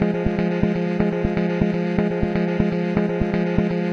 untitled3 5-drone2
drone, funeral-dirge, loop, synth